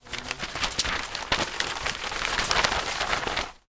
Papers Flapping; Intense
Paper being flapped intensely.
flapping, paper, wind, pages, intense, rustling